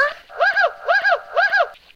Flowers Like to Scream 19
vocal, screaming, stupid, psycho, yelling, noise, very-embarrassing-recordings, not-art